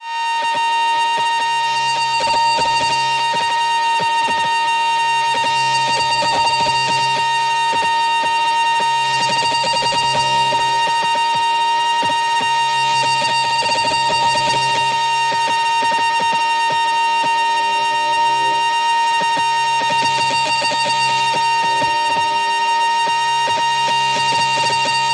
1 tone of unstable radio frequency. It's a synth sound.
irritating, frequency, synth, noise, static, distorted